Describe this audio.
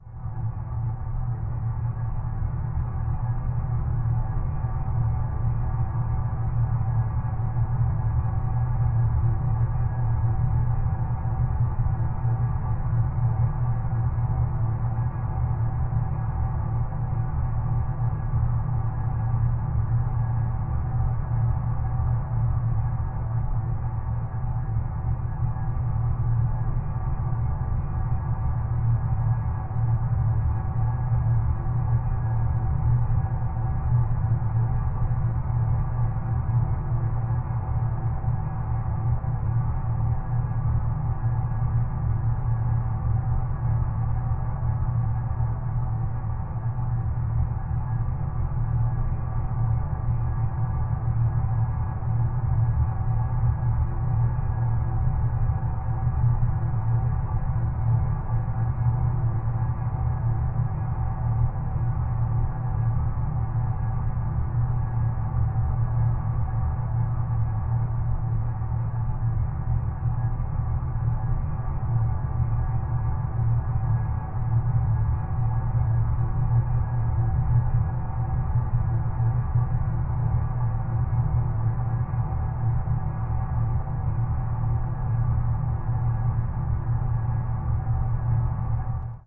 Created using a lot of reverb, tremolo, and echo effects.